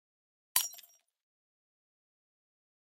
Breaking Glass 06
break, breaking, glass, shards, shatter, smash